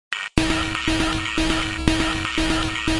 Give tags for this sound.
beep rhythm noise

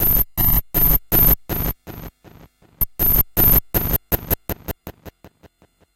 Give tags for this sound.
bend bending circuit glitch phone toy

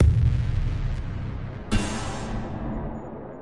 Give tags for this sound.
70BPM noisy

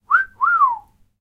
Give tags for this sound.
whistling wolf fast

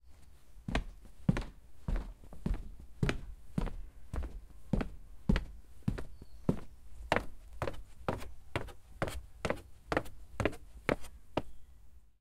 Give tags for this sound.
wood-stairs walk stairs feet outside deck wood wooden-stairs porch footsteps floor steps walking outdoors wooden

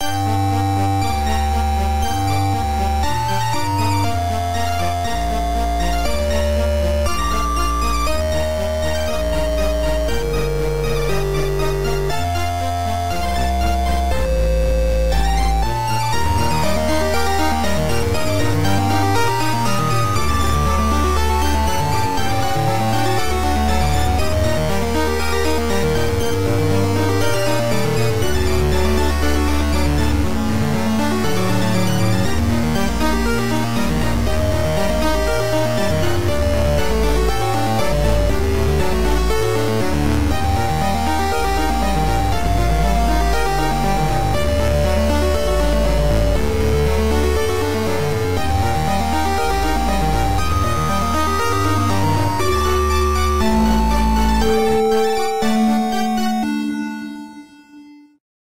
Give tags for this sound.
nes musagi chiptune

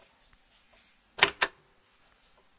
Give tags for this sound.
button pressure Radio switch

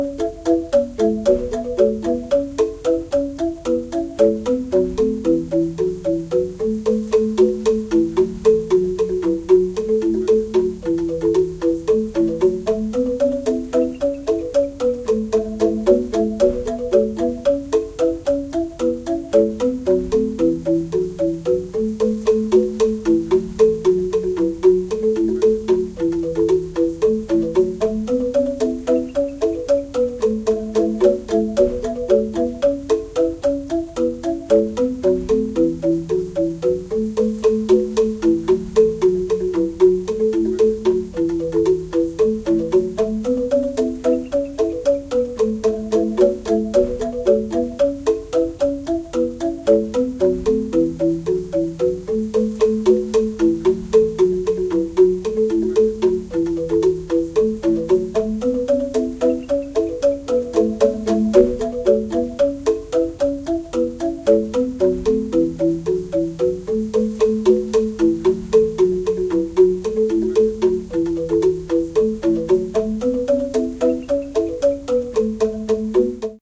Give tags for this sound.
bali music traditional xylophone

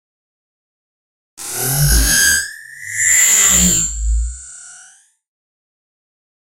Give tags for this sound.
processed sound-effect fx horror effect sci-fi sound

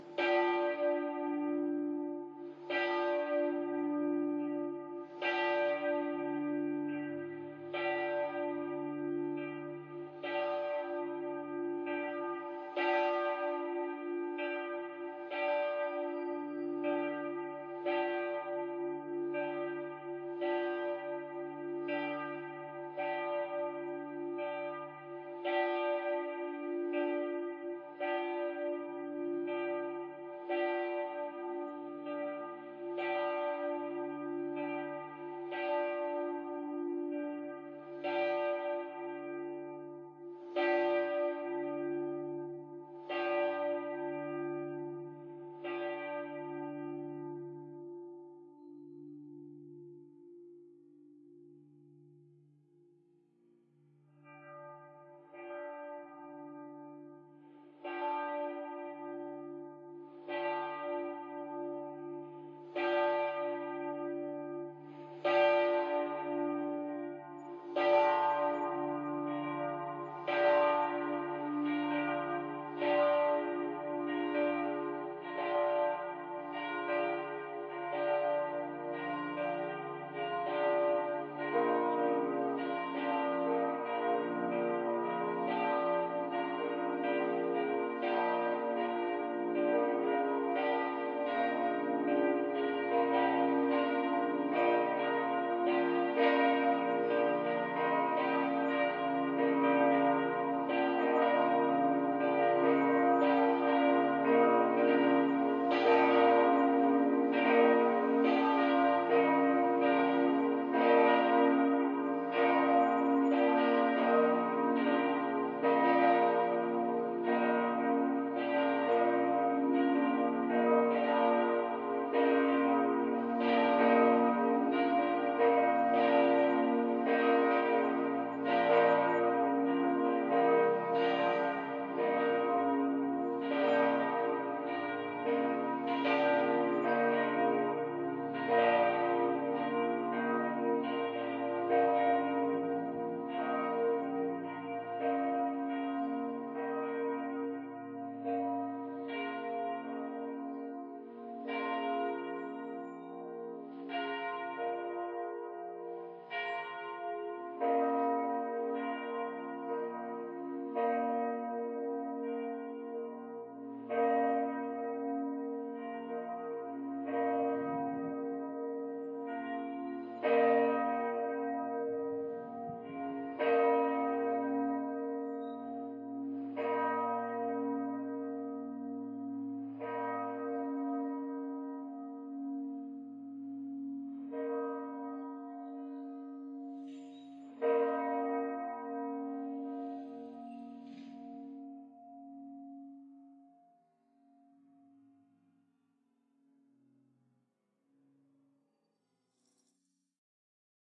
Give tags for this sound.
bells cathedral church church-bells field-recording ringing

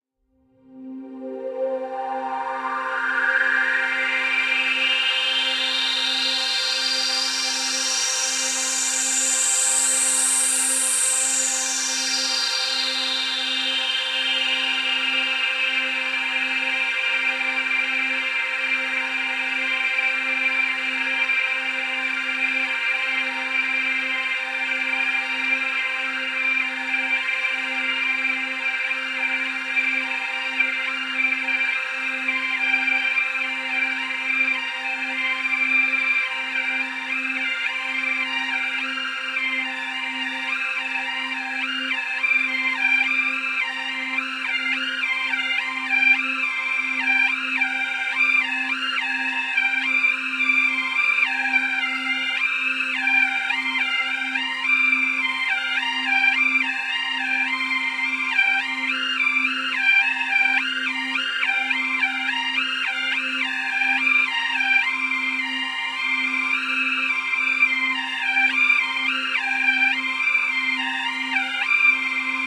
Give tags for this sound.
ambient pad soundscape space texture

